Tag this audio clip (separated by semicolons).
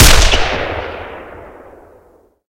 47; AK; bulgaria